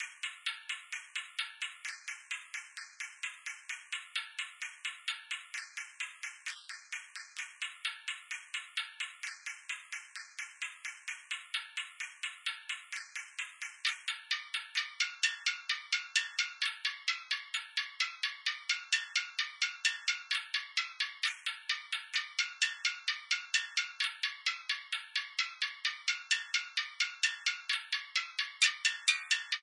Guitar music from a sad chords
A guitar music made with FL Studio.
I toke Some minor chords and arpeggiated thim using the pattern 1 2 3 2 And played on 2 octaves
Have fun.
130 BPM.
16 Bars.
Injoy.
Acrostic, Guitar, Music